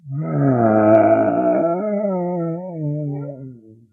zombie pain1
creepy, game, pain, scary, zombie